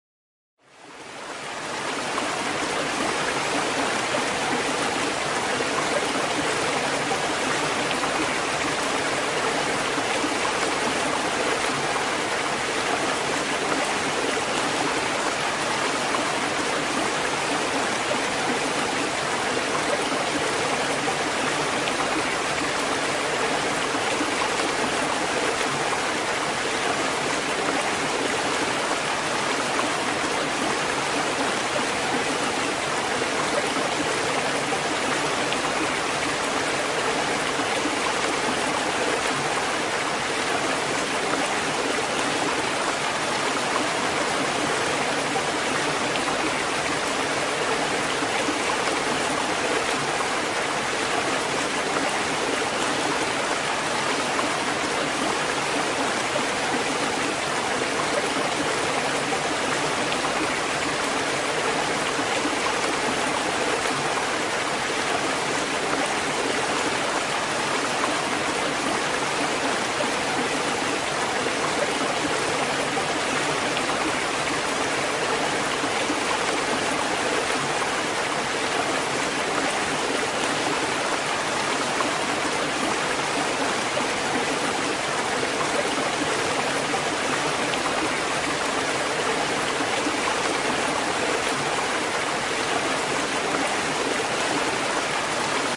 Zen Ocean Waves,Ocean Waves Ambience
water, nature, shore, Zen, beach, Ambience, waves, surf, sea, ocean, Crashing